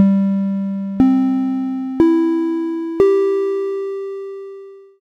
4-tone chime UP
A simple and short 4-tone chime going up.